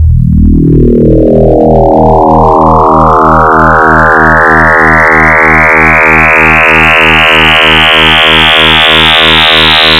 Sin[500*t + 10*t*Sin[250*t]] for t=0 to 10
formula, mathematic